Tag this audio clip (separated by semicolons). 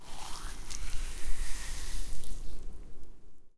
Knife; Wet-sand; Scary; Digging; Scrape; Horror